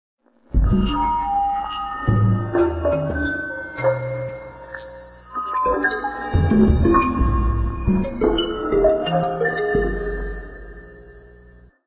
created from an emx-1